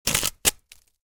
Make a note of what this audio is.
Scratching a piece of wood.